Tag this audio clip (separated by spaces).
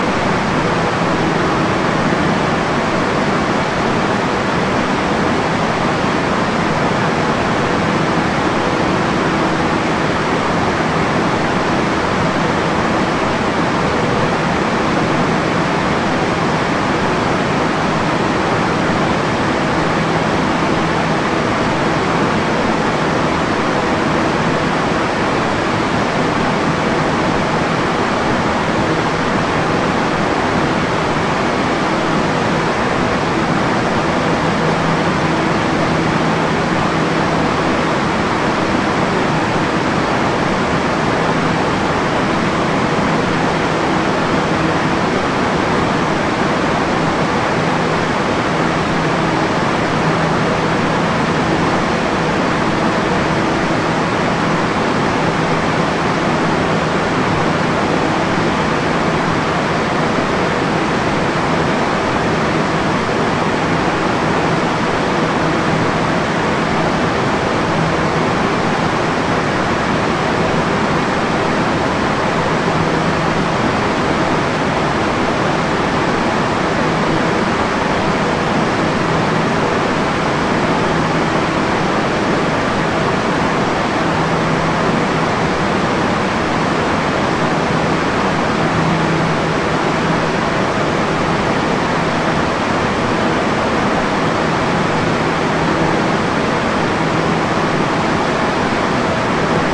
relaxation wind noise howling loud industrial windy relaxing ventilation industry sample factory air-conditioner ventilator air-conditioning